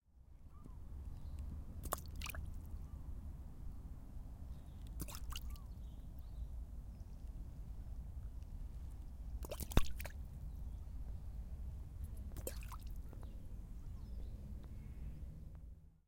Throwing stones to lake at Porto's Parque da Cidade.